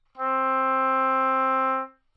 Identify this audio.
Part of the Good-sounds dataset of monophonic instrumental sounds.
instrument::oboe
note::C
octave::4
midi note::48
good-sounds-id::7960